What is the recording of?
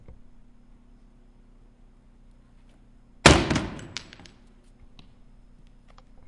Chair Thrown, Crash, bolt fell out after crash
Chair thrown and crashed, a bolt from it fell to the ground at the end, raw data, unedited.
chair, smash, ruckus, metal, furniture, crash